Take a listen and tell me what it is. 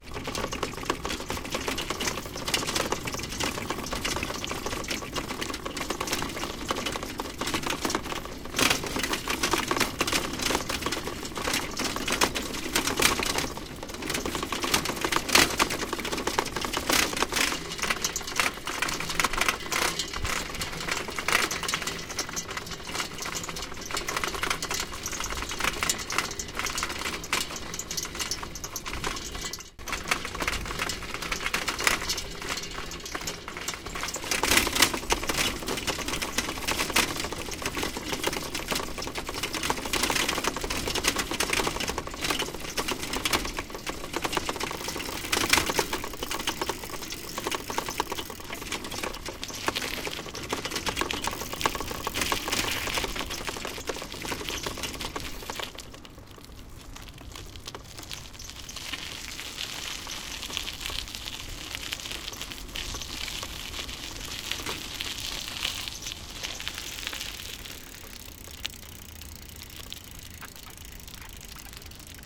Shaky Windy Bike Ride
Windy bike ride.
Recorded with Zoom H2. Edited with Audacity.